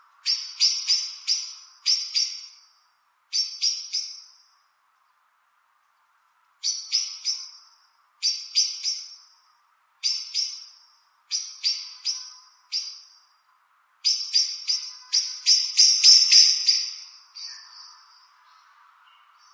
call of a blackbird, low-cut filtered. RodeNT4>Felmicbooster>iRiver-H120(Rockbox)/ canto de un mirlo, con filtro de paso alto
nature, blackbird, city, field-recording, birds